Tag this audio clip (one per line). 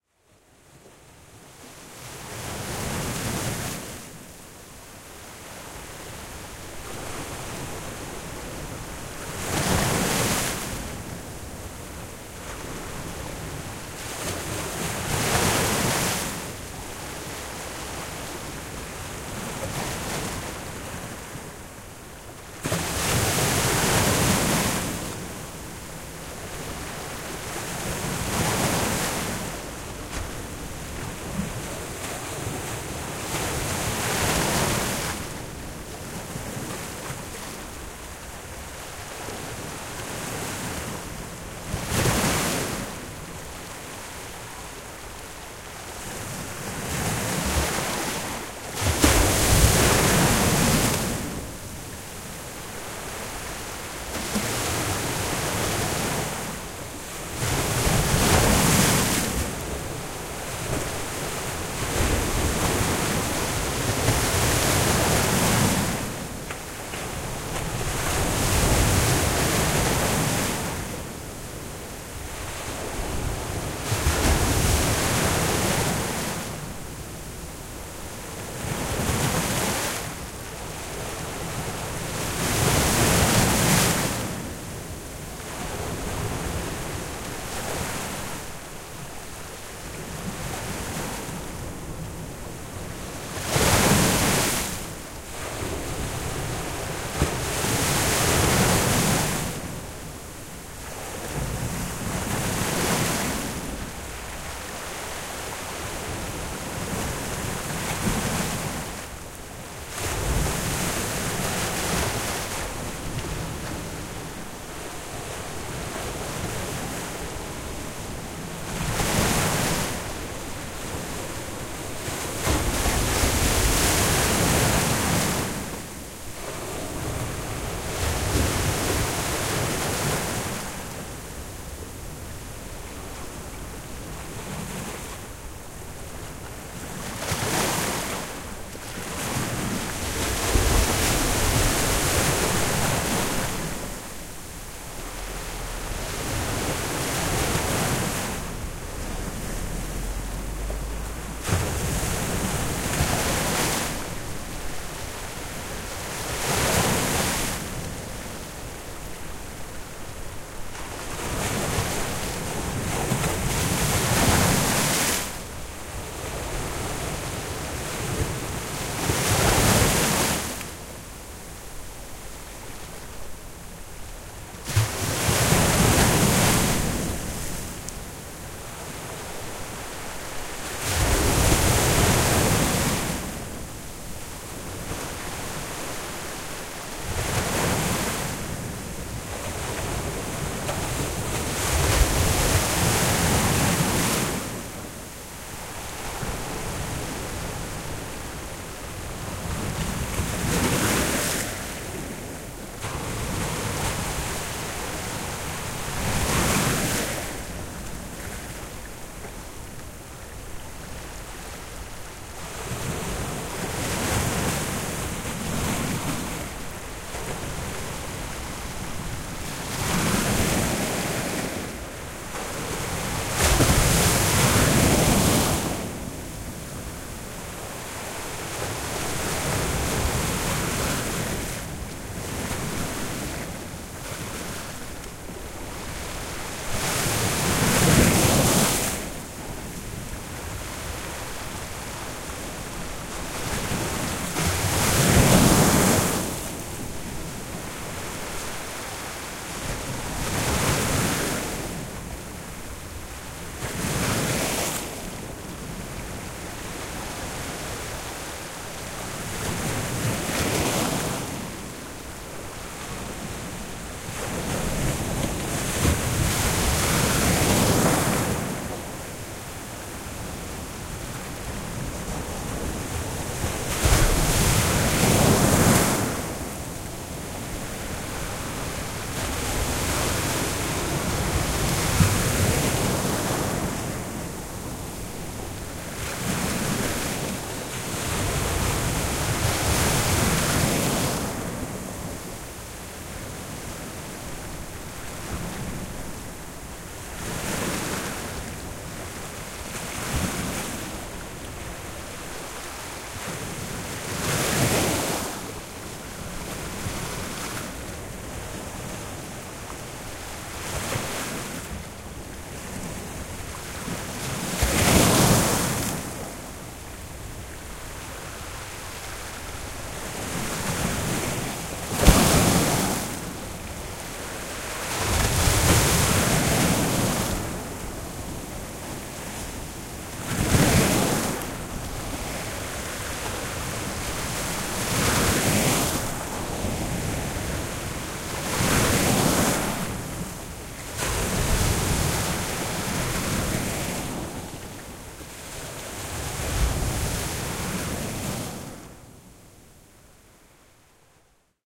sea
field-recording
waves
water